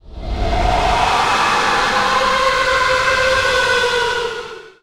Ghost scream
Horror, Loud, Scary, Scream, Serious